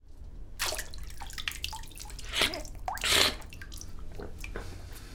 Drinking Water with Hand
drink, hand, water